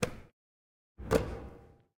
basketball grab 2versions
grip, grab, basketball